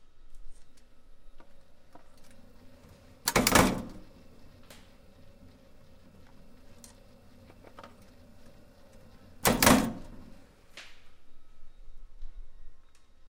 Punch Press, 10 Ton, Clip1
Kenco 10 ton punch press, forming and crimping a 3/4" diameter x 1.5" long steel cylindrical part on to a rubber hose. Recorded in mono with an Edirol R44 recorder and a Shure SM81 microphone.
factory, machine, metal, press, punch